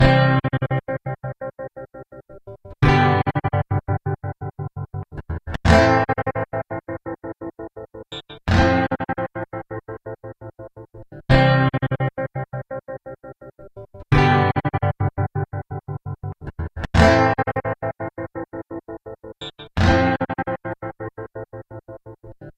Guitar Loop Track 1
Guitar loop 85bpm. Edited in Acid. Chords: C G Am F
85bpm, acoustic, guitar, loop, rhythmic